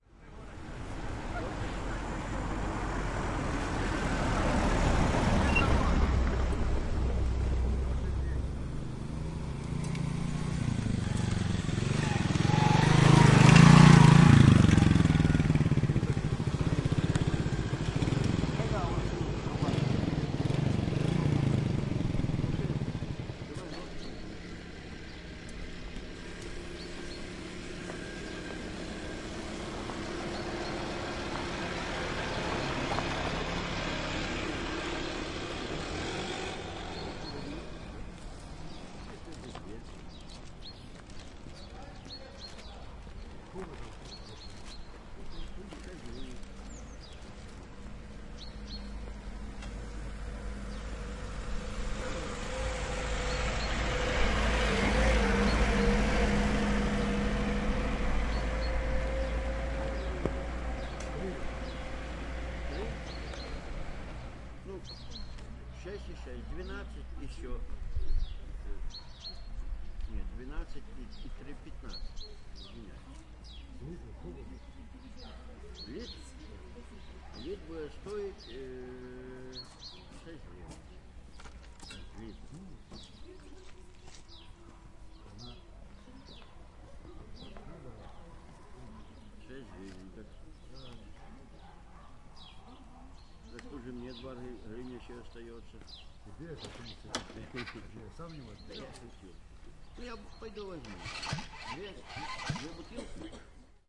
Ext, crossroads, peoples, cars, birds
ambience at the village crossroad